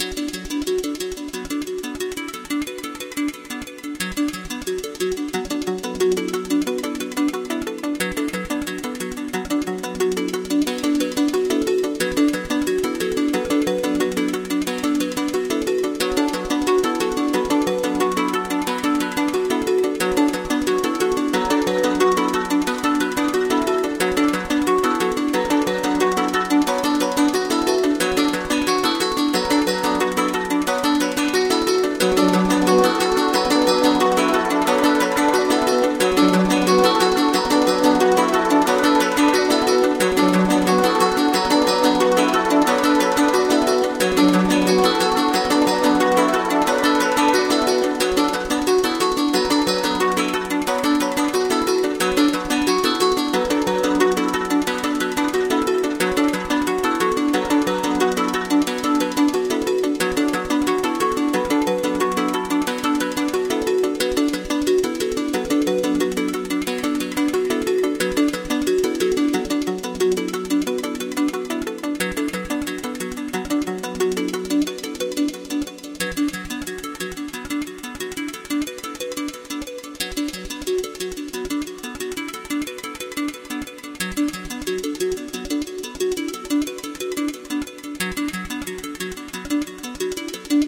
I'm so plucked
Sampled plucked instruments combined in my music software for a song I was writing.